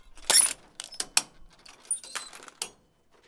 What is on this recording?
Crushing an aluminum can in the backyard with our can crusher.